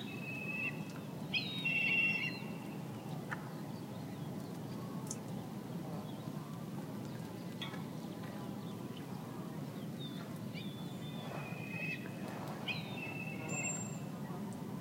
20060425.prey.bird
call of a Black Kite. Sennheiser ME62 -iRiver H120 / grito de un milano negro
nature, field-recording, kite, spring, birds